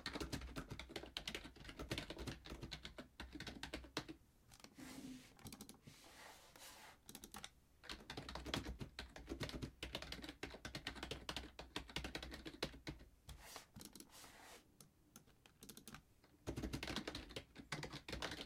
Typing Real
Typing on a keyboard and clicking a mouse.
button, computer, keyboard, mouse